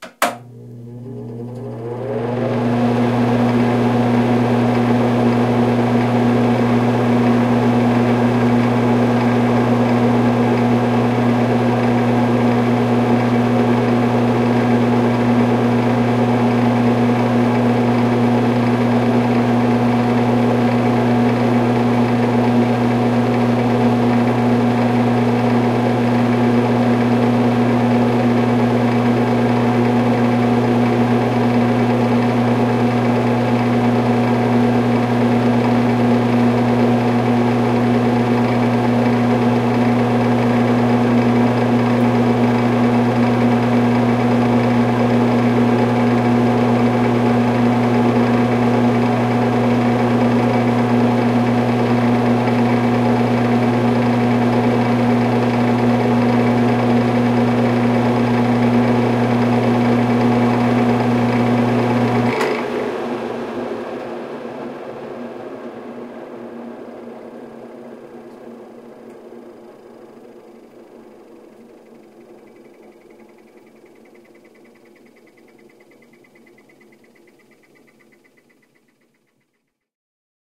etl exhaust Fan 24-96
An exhaust fan, with on and off switch.
ventilation, electronic, mechanical, motor